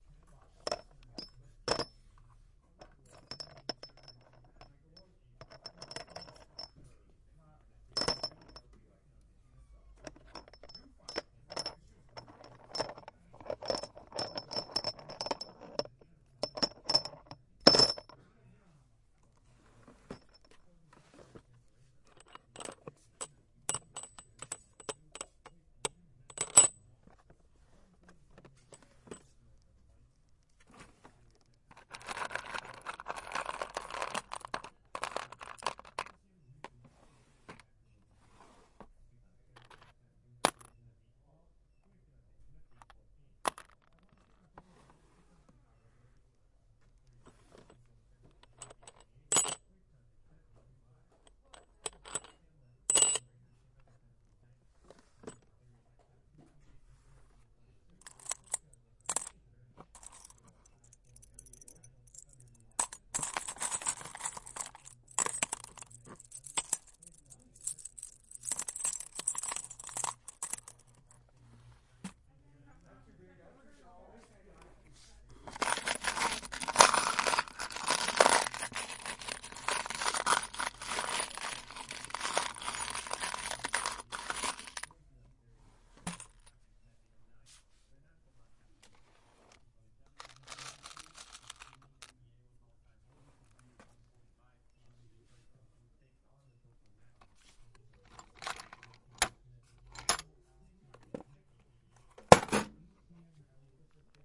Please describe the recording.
dropping heavy nails into a box
one in a series of recordings taken at a hardware store in palo alto.
clicks, dropping, hardware-store, many-of-the-same-thing, metal, metal-on-metal, nails, plastic, rustling, switches